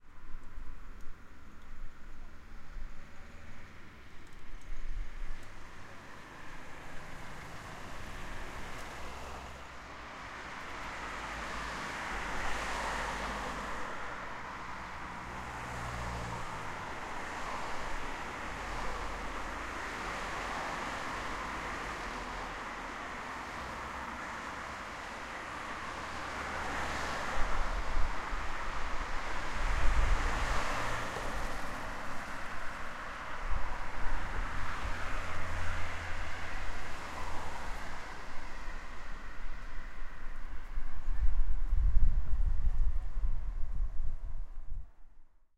city street traffic passing cars
cars, city, passing, street, traffic